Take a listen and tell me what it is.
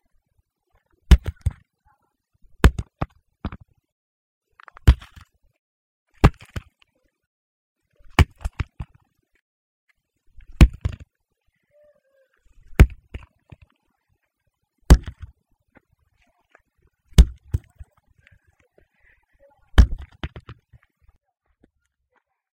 A large concrete block hitting the ground with a thump.